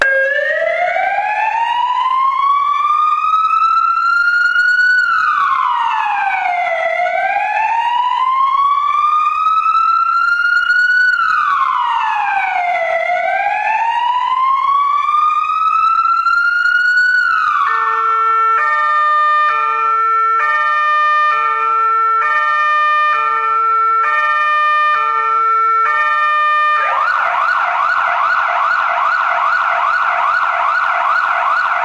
This sound was recorded with an Olympus WS-550M and it's the sound of the emergency sirens from a fire truck.